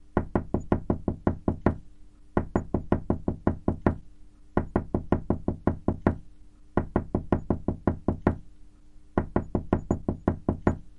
knocking on a door gradually increasing in volume and intensity
doors, knocking, field-recording